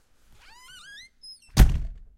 Close door with squeaky hinges
Recorded with a Sony PCM-D50.
Closing a door.
close, door, hinges, squeak, squeaky